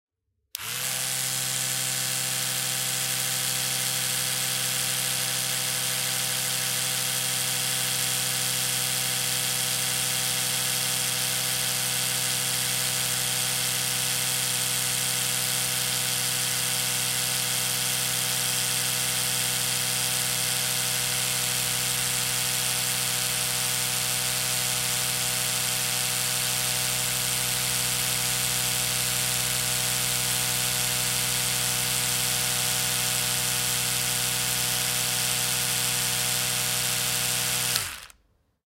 electro toothbrush with head away
Electrical toothbrush with brush-head, "near" position. In some way it sounds like a dental drill.
Recorded with Oktava-102 microphone and Behriner UB-1202 mixer desk.
kitchen, bathroom, drill, near, electrical, dental